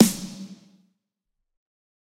Snare Of God Wet 021
drum, drumset, kit, pack, realistic, set, snare